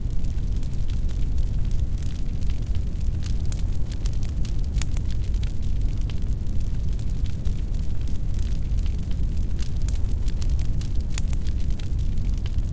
Ambiance Campfire Loop Stereo
Close Recording of a Campfire (Loop).
Gears: Tascam DR-05
ambiance, ambiant, bass, big, bonfire, burn, burning, campfire, crackle, crackling, deep, fire, flame, intense, low, rumble, spark, sparks